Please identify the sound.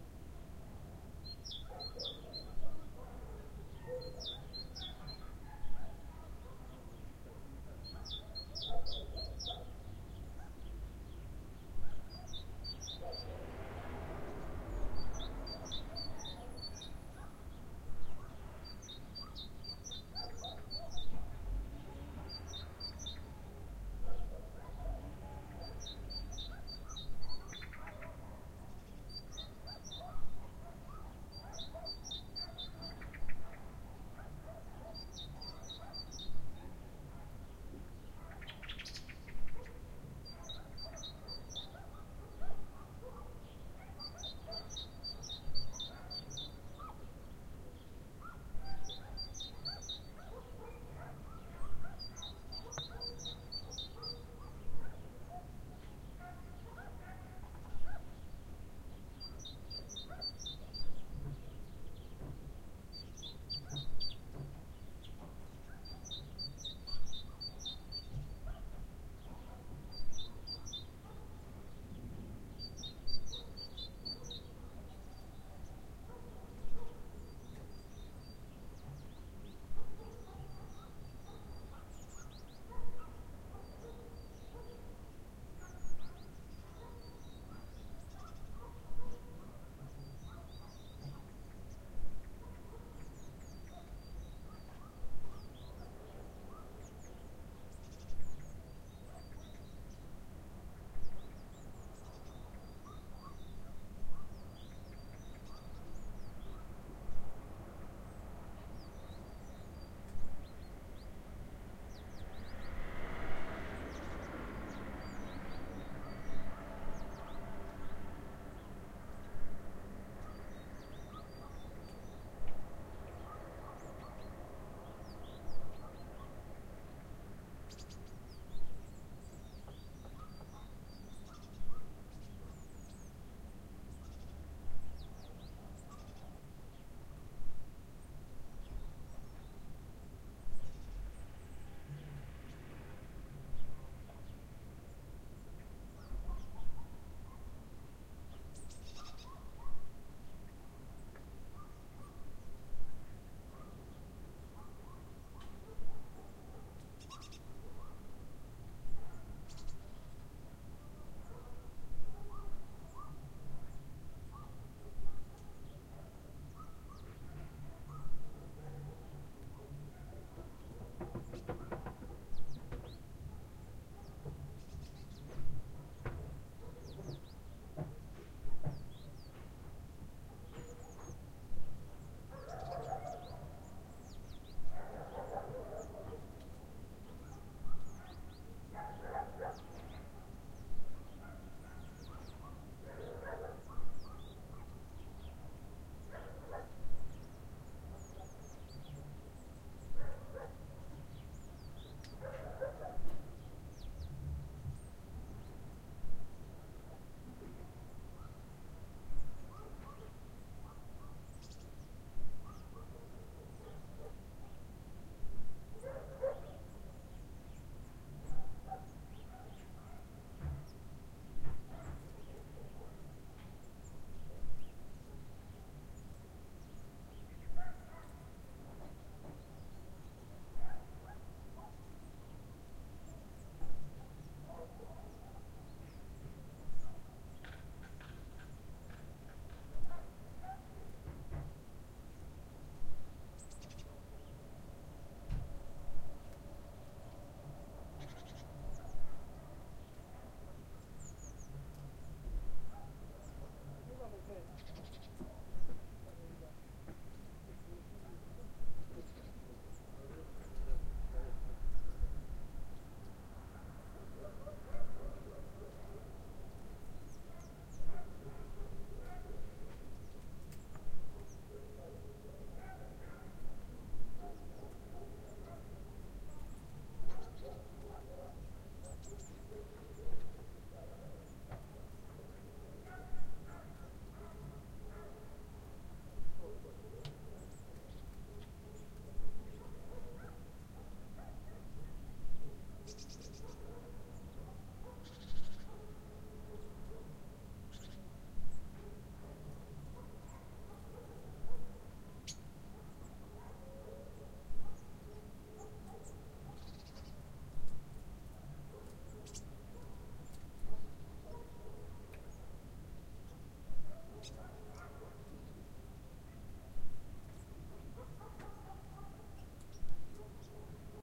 winter dogs birds
space at the back of village house during winter, H4stereo recorded
winter, birds, dogs